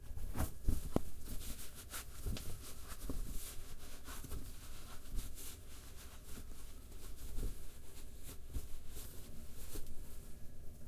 wiping hands in towel
wiping-hands-in-towel, wiping-hands, towel, washing, bathroom